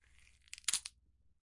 Snapping celery in my basement:)